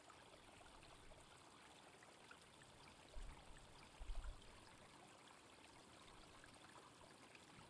Recording of a small stream. Cleaned up in RX2.